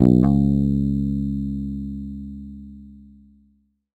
First octave note.
bass, electric, multisample